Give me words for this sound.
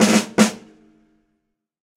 a percussion sample from a recording session using Will Vinton's studio drum set.